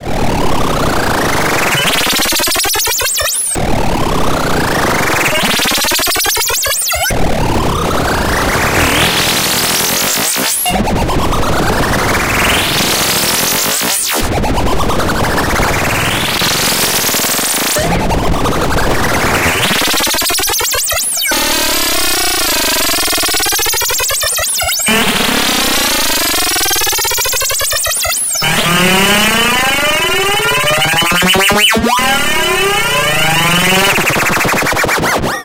Boson Spinner - 01
spinning, distort, spin, lfo, sweep, oscillator, sound-design, synthesis, ray, sfx, fx, oscillation, wobble, sound-effect, glitchmachines, laser, retro, digital, synth, sci-fi, distorted, modulation, sounddesign, scope, soundeffect, warp, wobbling, sine